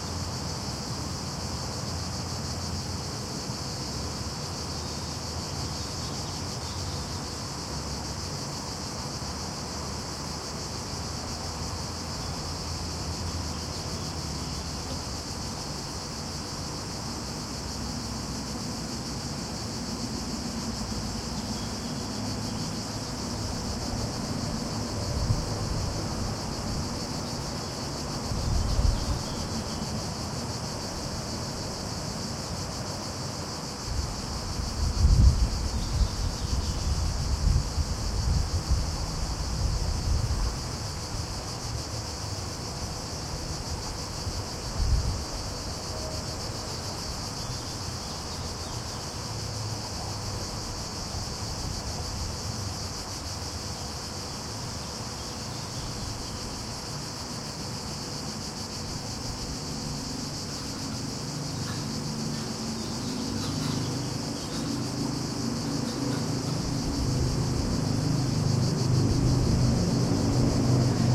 120731 Mestre AT EvNeutral 1 F 4824
Evening in a quiet parking lot in the city of Mestre, Italy, featuring some quiet and diffused background traffic and crickets.
These are some recordings I did on a trip to Venice with my Zoom H2, set to 90° dispersion.
They are also available as surround recordings (4ch, with the rear channals at 120° dispersion) Just send me a message if you want them. They're just as free as these stereo versions.
urban, evening, atmo, traffic, mediterranian, crickets, field-recording